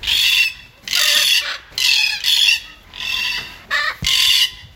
Black Cockatoo (Calyptorhynchus banksii)
Several sounds of a black cockatoo. Recorded with mobile phone.